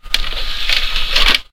DVD-Drive
Computer DVD drive closing. Recorded with a cheap headset mic.
computer, industrial, metal, object, sample, unprocessed